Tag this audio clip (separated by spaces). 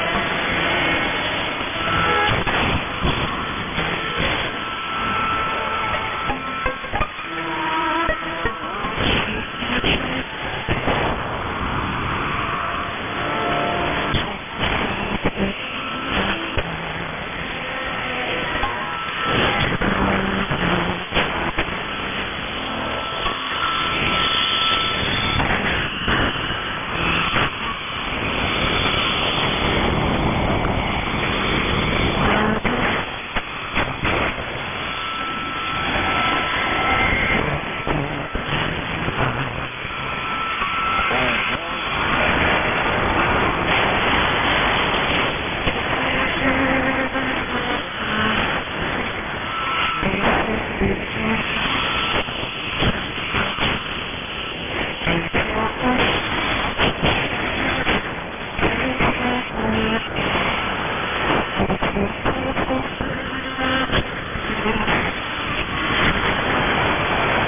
AM radio